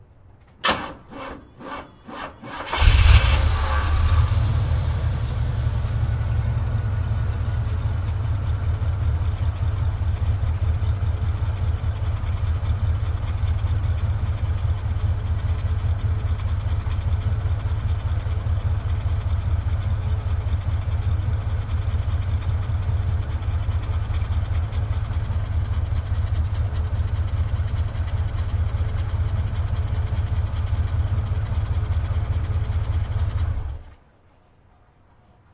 Vintage Cadillac Muffler Turn on Idle Off Rattle
vintage, cadillac, car, muffler